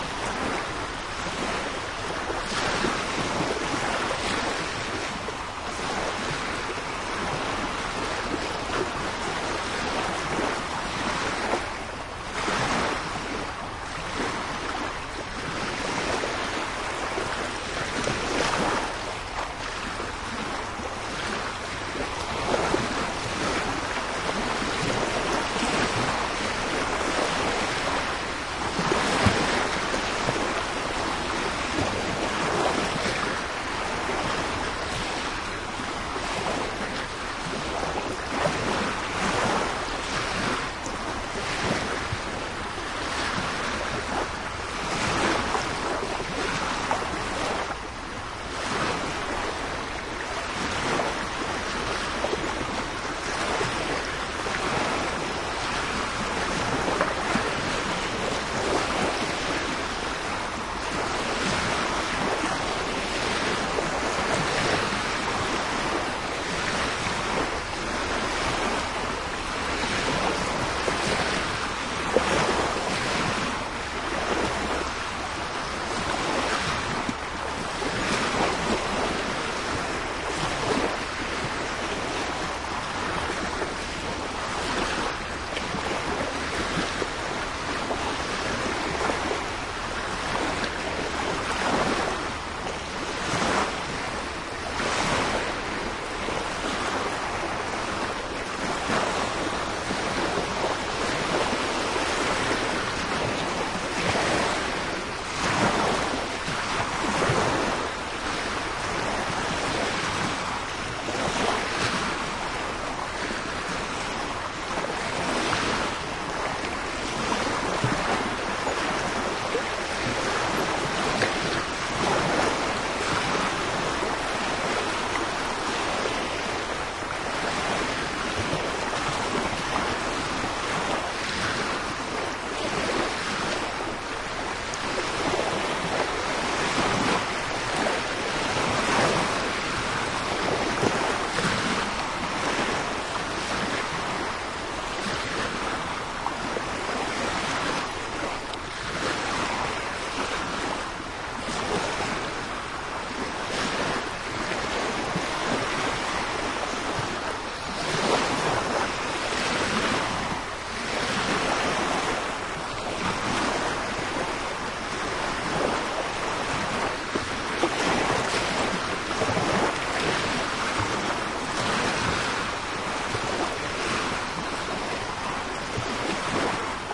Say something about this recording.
waves closeup
A close up of them waves in the Ho Bugt on the westcoast of Denmark. Sennheiser MKH40 microphones, Shure FP-24 preamp into R-09HR recorder.